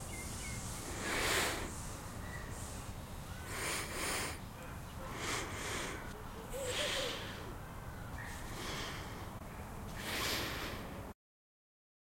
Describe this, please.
Sniffing flowers
Me smelling roses: different lengths and depths of sniffs and bird calls. Recorded with a zoom H6 recorder/ microphone on stereo. Recorded in South Africa Centurion Southdowns estate. This was recorded for my college sound assignment. Many of my sounds involve nature.
garden, roses, flower-garden, spring, birds, summer, smelling, sniffing, Flowers, field-recording, OWI, smell, nature